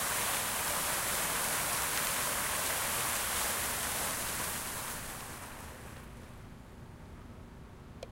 Day Fountain Stopping
Field recording of a water fountain stopping during the day.
day, field-recording, fountain, stopping, water